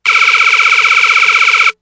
Gun Shoot 8
"Gun Sound" made with Korg Electribe recorded to Audacity.